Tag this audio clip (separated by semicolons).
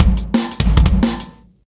jungle; break; bass; beat; percussion-loop; groovy; drum; amen; drums; breakbeat; rhythm